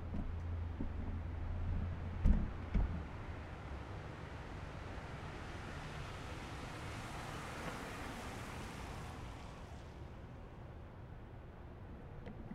Single Car Approach and stop
A car approaches and stops at a red light